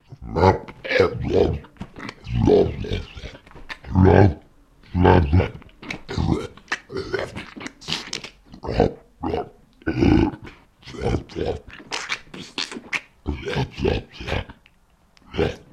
The fat phase of a growing monster.
Recorded using NGT-2 directly by laptop microphone in. Pitch shifted using Audacity.
voice, monster, fx